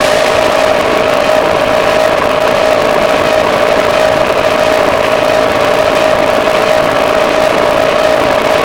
Digi Choir
digital, fx, harsh, pad